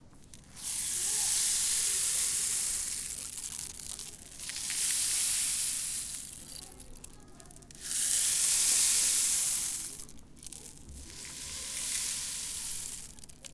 SonicSnap GPSUK Group8 Rainstick
galliard, sonicsnap